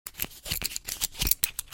perc-rolling-machine
Cigarette rolling machine sounds, recorded at audio technica 2035. The sound was little bit postprocessed.
percs, wierd, perc